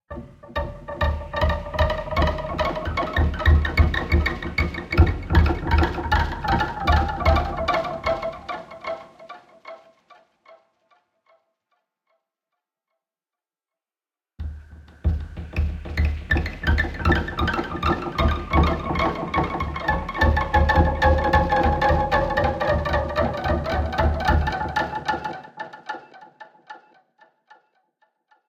Cello String Hits 2 (by Claire Elizabeth Barratt
Created by Kimathi Moore for use in the Make Noise Morphagene.
“The sounds I've tried sound very good for the Morphagene i hope, and are very personal to me. That was bound to happen, they're now like new creatures to me, listening to them over and over again has made them very endearing to me. I also added my frame drums which I thought would be a good addition, sound tools, heater, Julie Gillum's woodstove, and a small minimalist piano composition.. In addition to the roster 2 of them are from Liz Lang, whom I wanted to include here as she was my sound/composition mentor.”
morphagene, field-recording, mgreel, kimathimoore